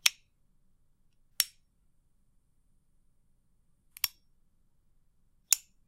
Metal lighter with electric flint. Open, light, release, close recorded with B1 straight to mixer and then to PC.